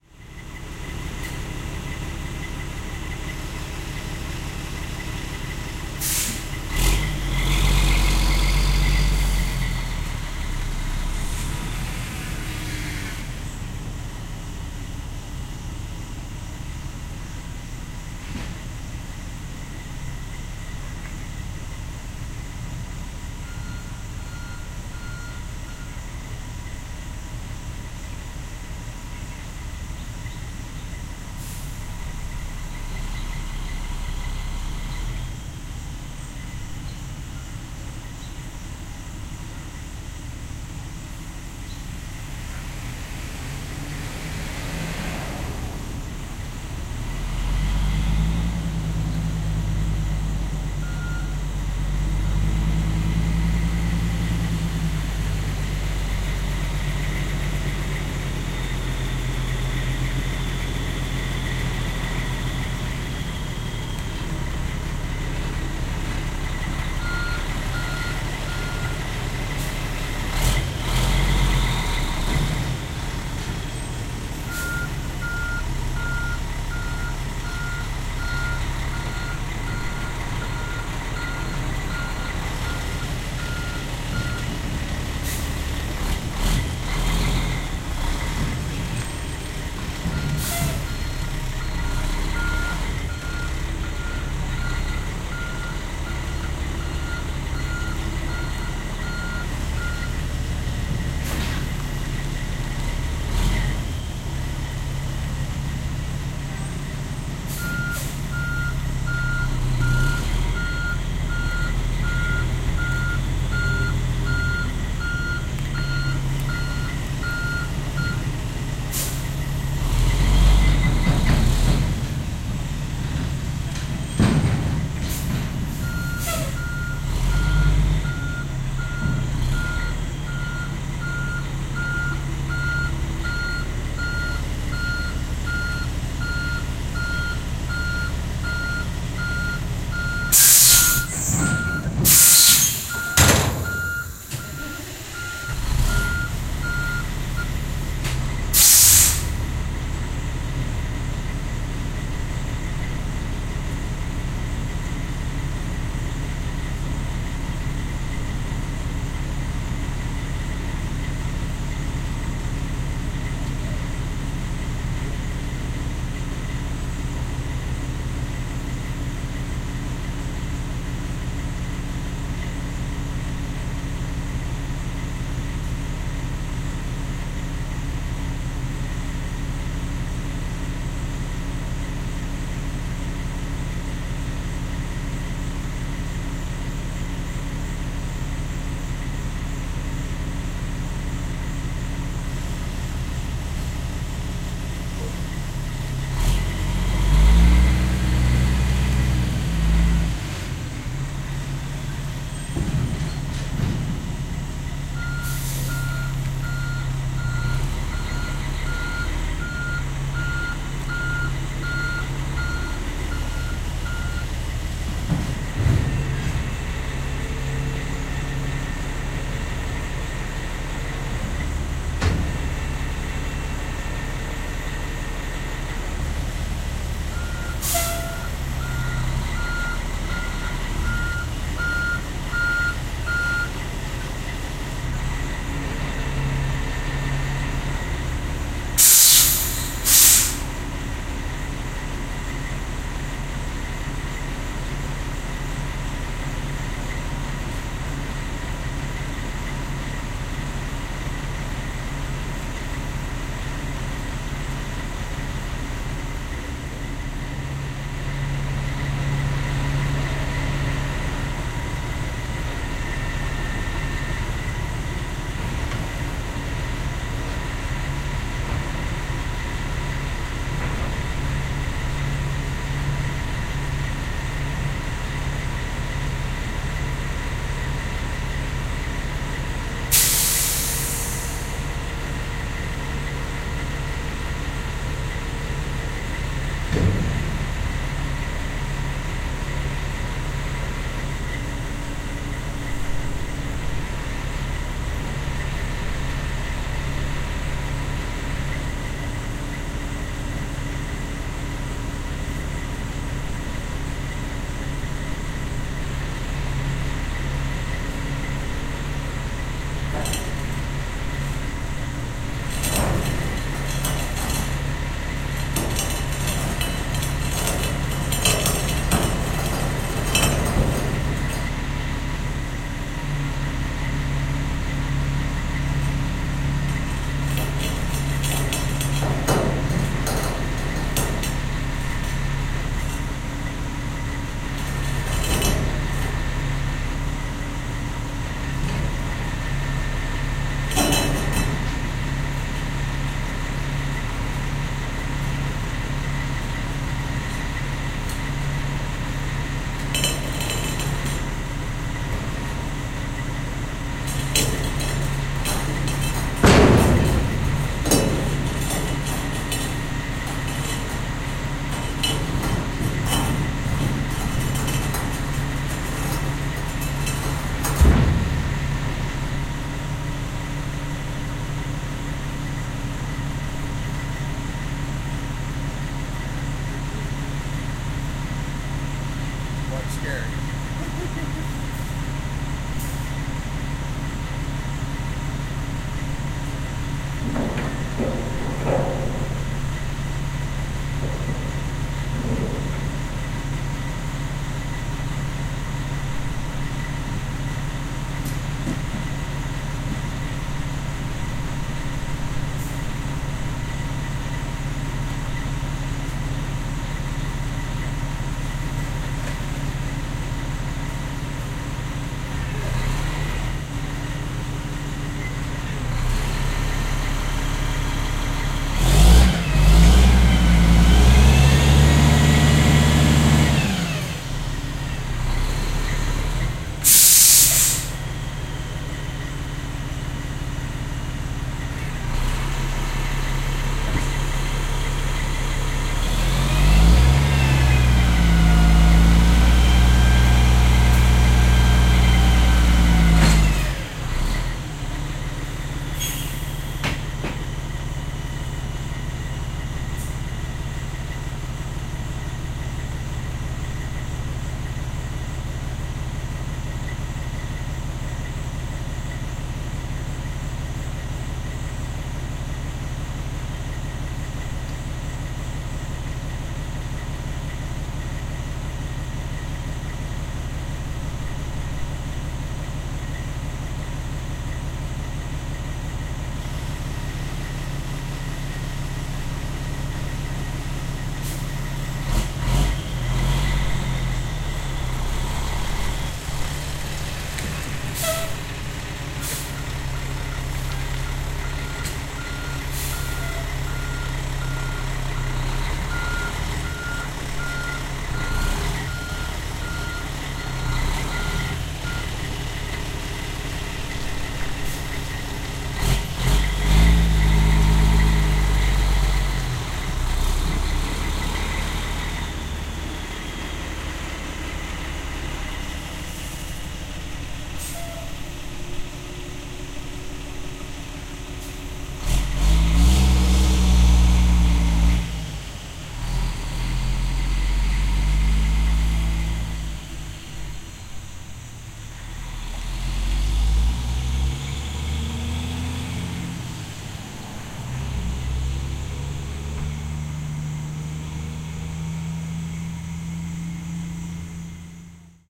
Construction Dumpster Delivery
A truck delivers a large dumpster used to haul off construction waste. Truck idles, moves into position, drops off the dumpster, and drives off.